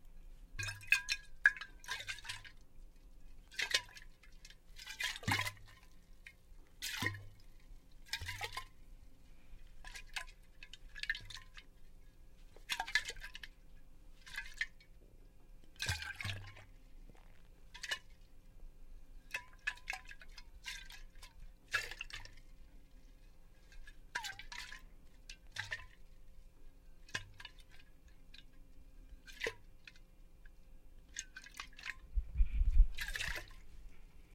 Bottle Swishing
Swishing liquid in a wine bottle at varying intensity
bottle, liquid, swishing